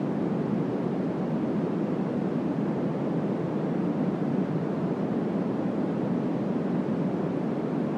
A flight noise generated from white noise.